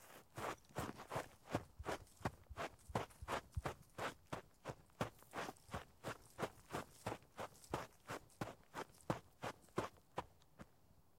Running in place Dirt Tennis Shoes
Running in place in dirt in tennis shoes
dirt, feet, foley, foot, footstep, footsteps, run, running, shoes, step, steps, tennis, tennis-shoes, walk, walking